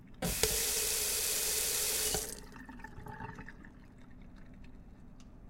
Another take of a faucet being turned on then turned off